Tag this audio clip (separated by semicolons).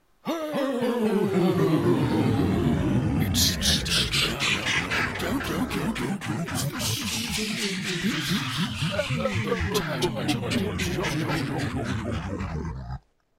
remix; glitch; sci-fi; trout; troutstrangler; weird; generic; strangler; santa; claus; santa-claus; holiday